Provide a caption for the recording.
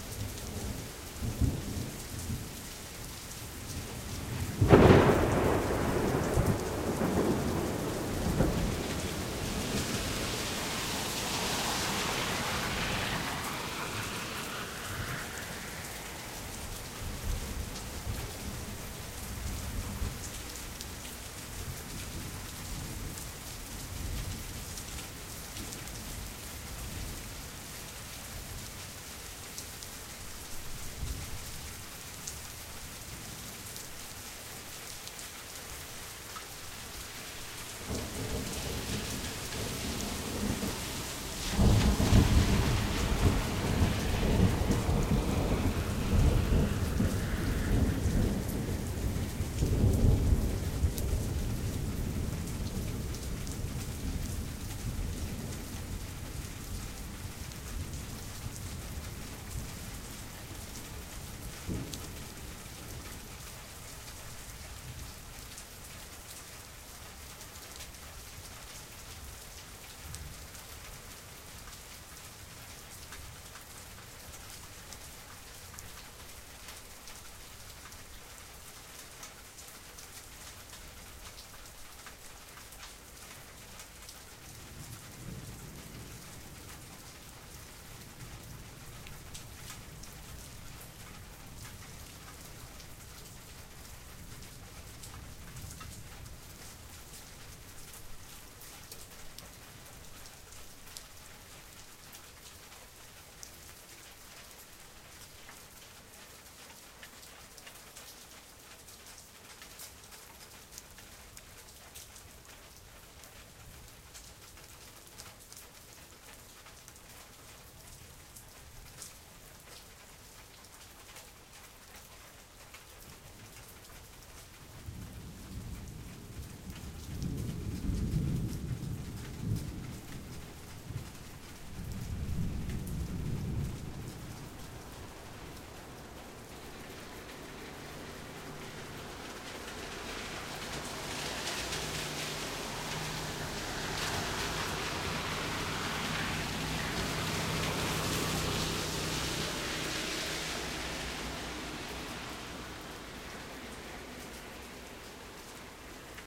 20120717 04am thunder1
Rain and thunder at early morning. Vehicles traveling on wet pavement.
Recorded 17.07.2012 04 am, Panphilova street, Omsk, Russia.
thunder, Russia, night, rain, early-morning